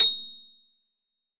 Piano ff 086